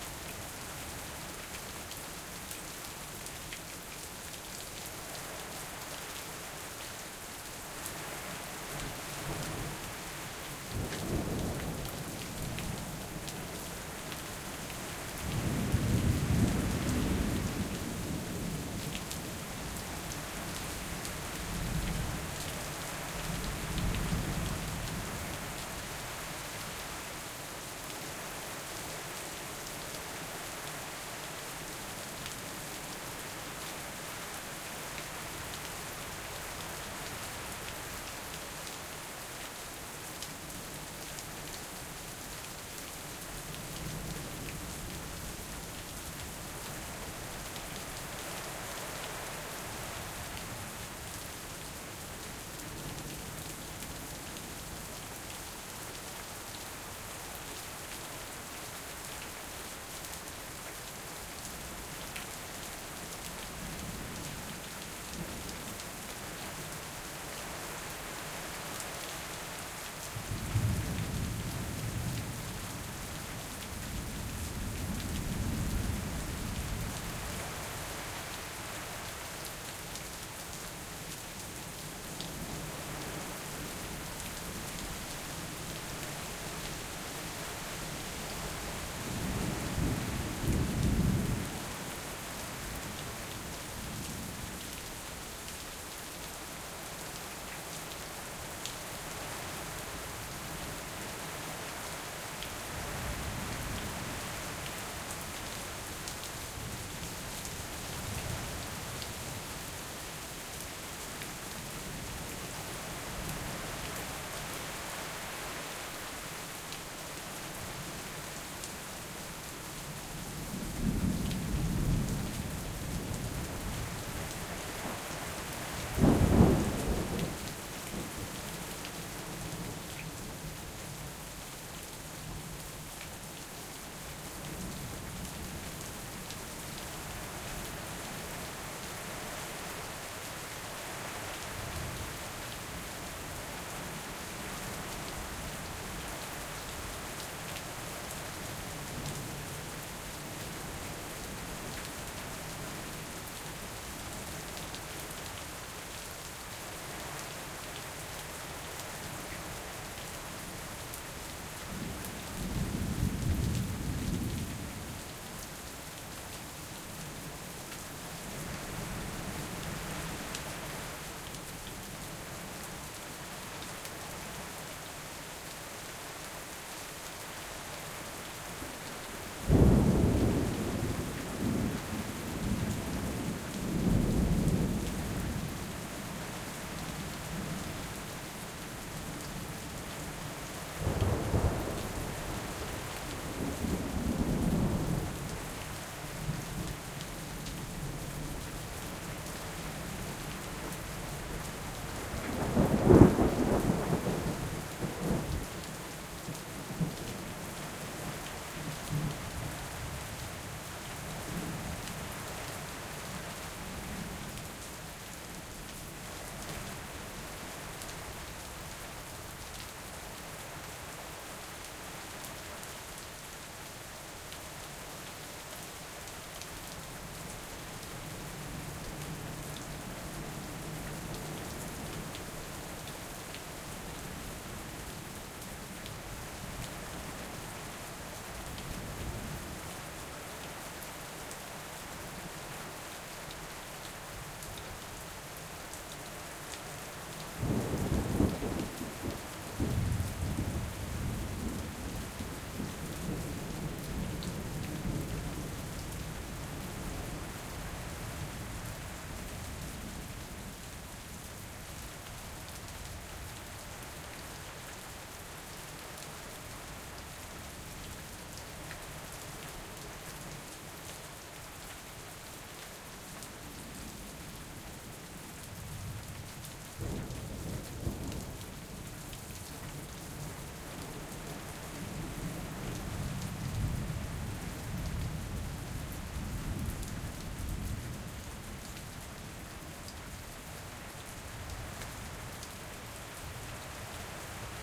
Rain and Thunder in stereo. Recorded using Zoom H5 and XYH-6 Mic